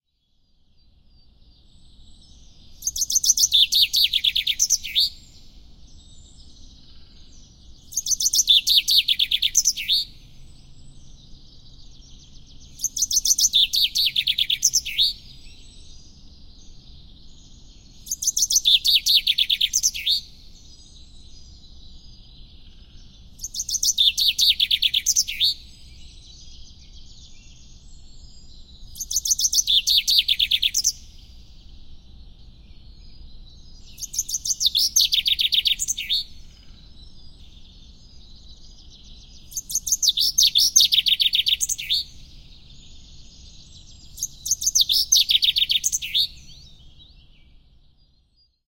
Sounds almost like a computer-game sequence, quite amazing call they have. This bird recording is done using a SONY D-50 and Audio Technica microphones attempting a binaural positioning.
6am, beekbergen, binaural, bird, birds, chaffinch, chorus, coelebs, dawn, dawnchorus, early, europe, field, field-recording, forest, fringilla, holland, morning, nature, nature-sound, naturesound, netherlands, recording, six, spring, tit, veluwe